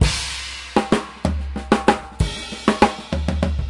Acoustic drumloop recorded at 130bpm with the h4n handy recorder as overhead and a homemade kick mic.
drumloop, loop, h4n, drums, acoustic